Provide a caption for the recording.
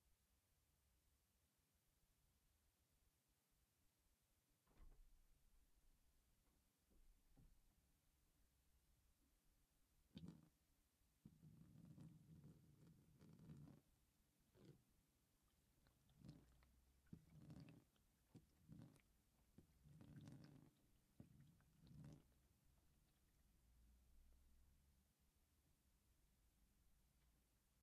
water on glass
GLASS, Liquid, Water